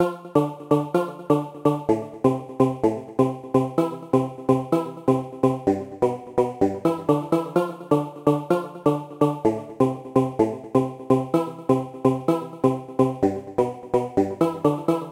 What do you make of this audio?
127-small car-loop
loop, music